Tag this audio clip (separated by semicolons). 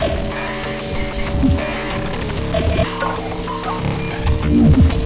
ambeint; cinema; experimental; glitch; idm; processed; soundscape